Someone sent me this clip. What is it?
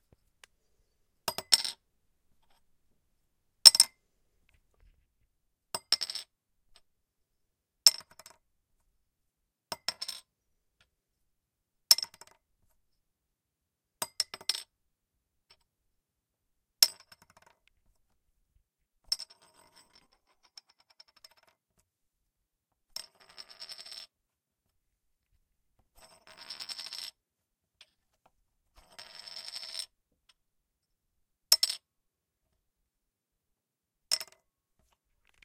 Bounce-BottleCapOnWood
metal bottle cap being dropped on hardwood desk
drop, bounce, wood, field-recording, bottlecap, desk